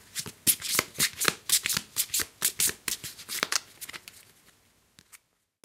Shuffling cards 01
Sound of shuffling cards
deck, card